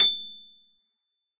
Piano ff 085